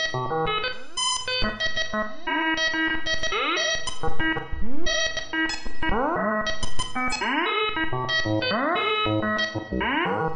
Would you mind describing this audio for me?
A sample of some kind (cannot recall) run through the DFX scrubby and DFX buffer override plugins